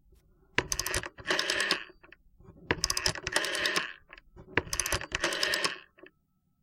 Dailing figure 2 on an PTT T65 telephone
T65, Dialing